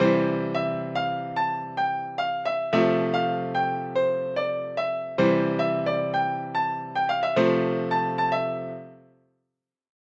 A short piano melody.
piano-chords, music